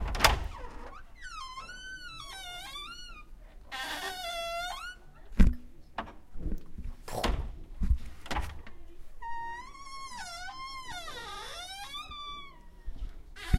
Sonicsnap LGFR Alicia Inès Iman Yuna
Field recording from Léon grimault school (Rennes) and its surroundings, made by the students of CM1-CM2 (years 5).
sonicsnap, France, Rennes